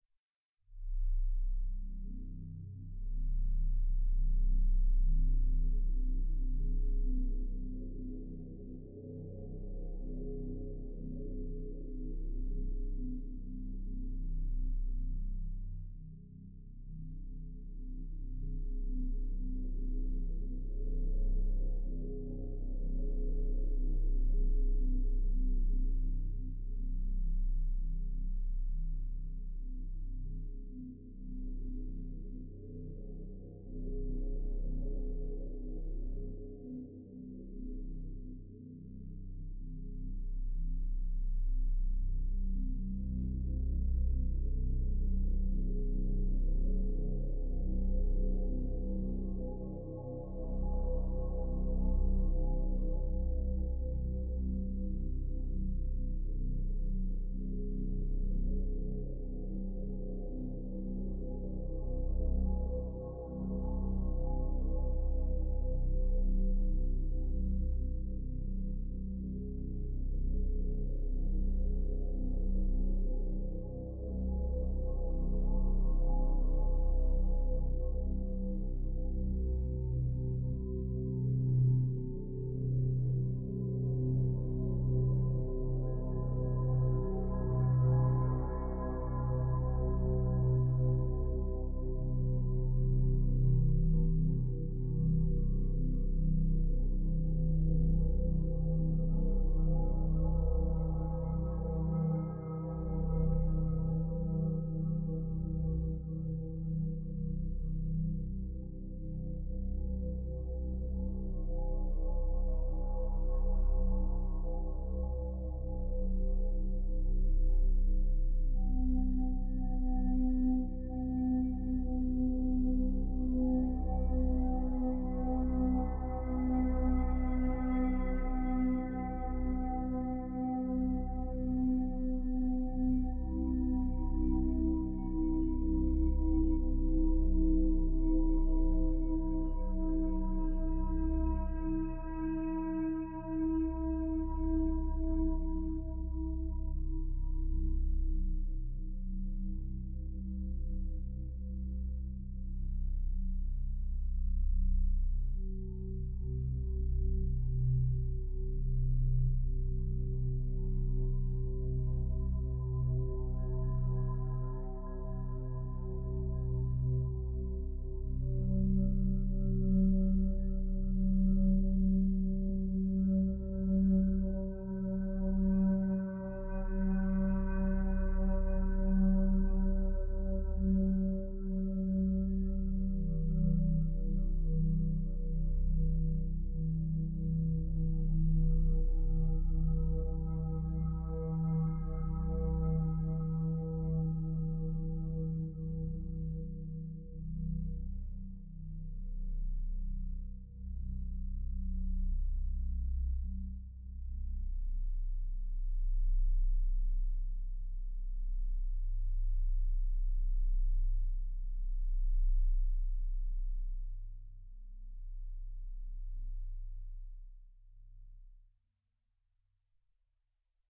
relaxation music #33
Relaxation Music for multiple purposes created by using a synthesizer and recorded with Magix studio.